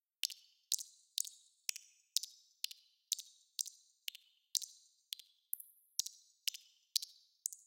tikkie wet loop
Pattern at 125 beats per minute of high pitched 'wet' percussive sounds.